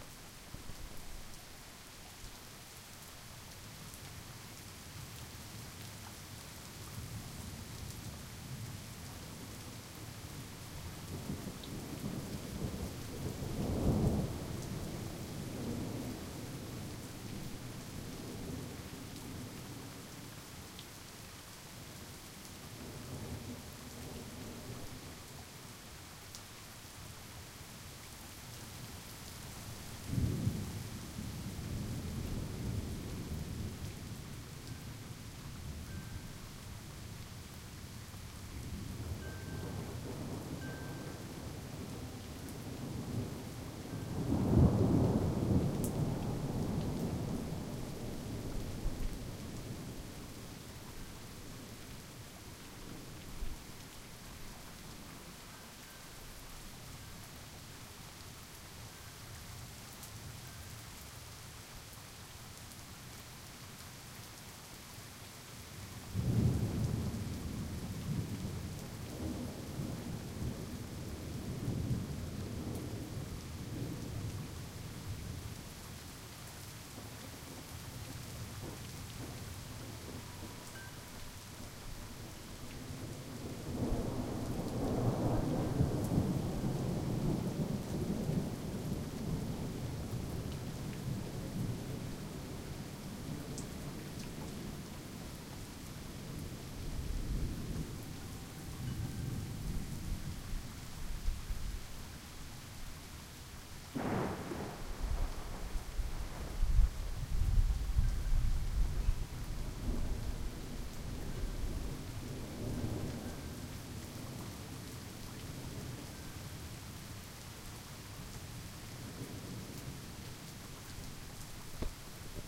Thunder storm recorded in Oklahoma 9/2011.
field, recording, rain, thunder, storm, weather, thunderstorm